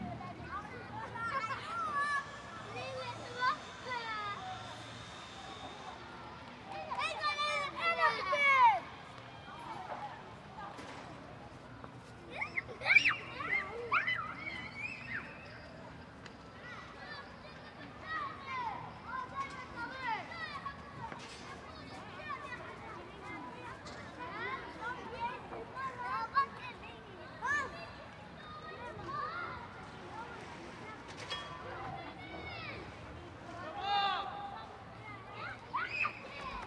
ruins Palestinian children kids playing on dirt mound in rubble of ruined buildings echo3 Gaza 2016
ruins, Palestinian, kids, playing, children